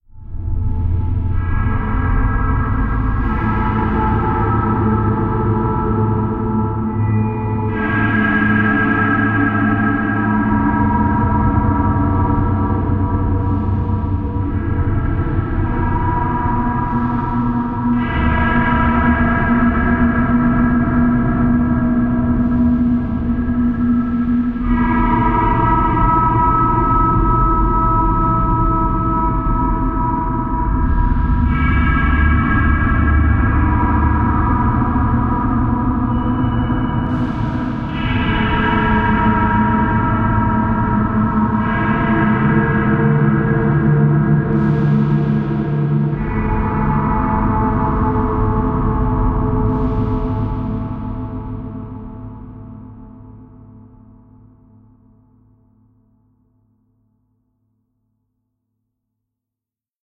alien sewers
alien, filter, fear, creepy, game, ambient, background, ambience, dark, film, reverb, drone, effect, fx